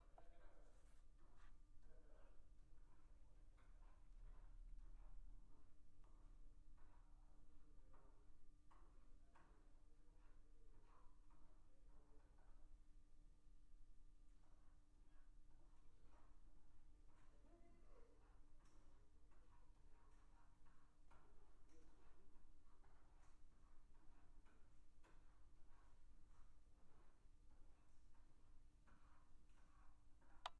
Kitchen at dorms.